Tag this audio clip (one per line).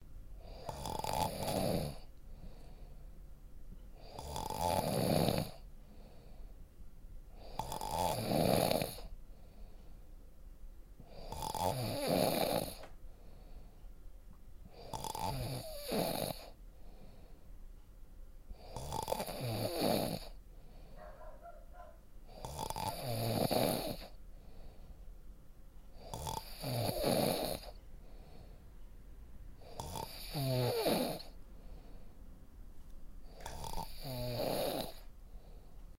Sleep
Snoring
Noise